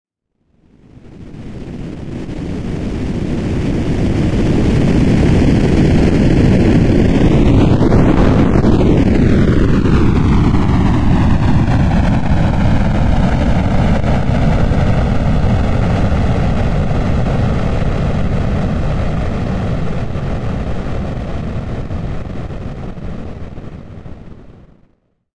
A rocket or Jet fly by made by me using pink noise and distortion in Adobe Audition. Enjoy :)
EDIT: Apparently there are high pitched points in here, but don't worry they go away after its downloaded